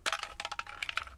kicking aluminium can